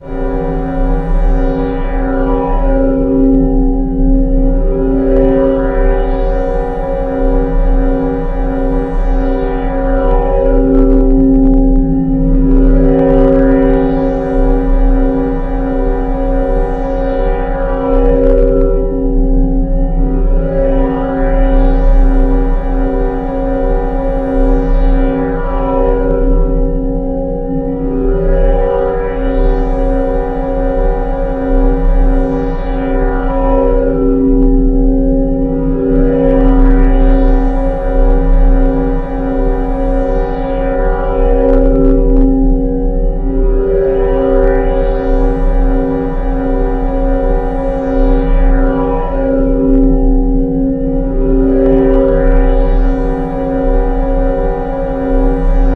reverberated version of risedrone